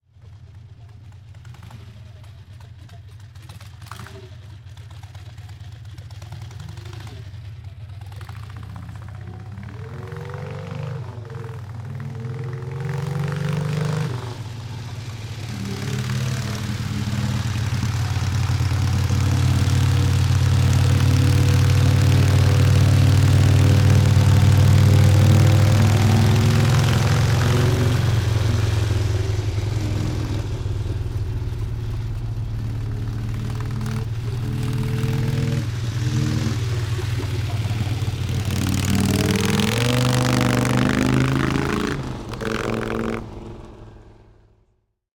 Harley Davidson XLCH 1974 3
Harley Davidson XLCH 1974, 1000 cc, during riding recorded with Røde NTG3 and Zoom H4n. Recording: August 2019, Belgium, Europe.
1974, XLCH, Motorcycle, Motorbike, Belgium, Harley-Davidson